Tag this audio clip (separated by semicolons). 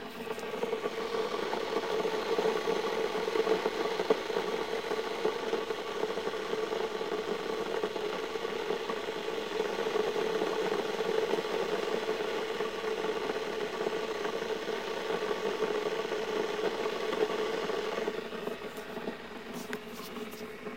boil; hot; loud; noise; steam; water